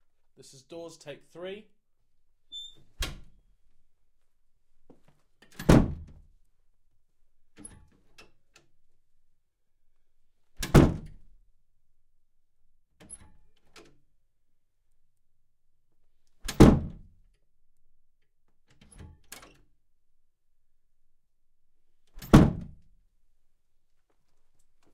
House Doors Opening Closing